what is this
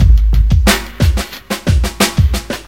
Includes the famous funky drummer, originally made by Clyde Stubblefield for James Brown. Made with HammerHead Rhythm Station.